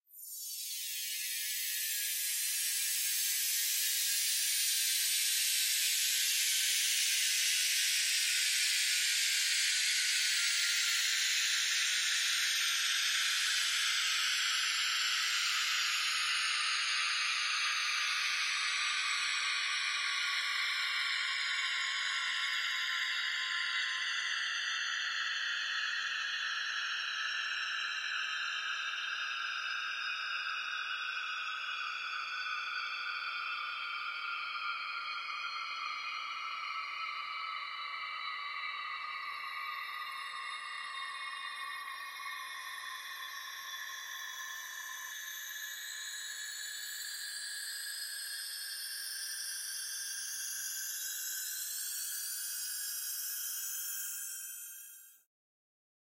This results in a 'falling pitch' falling from very high.
The last 8 instances had varying speeds and have 'negative' speeds towards the end, resulting in the rising pitch in the end.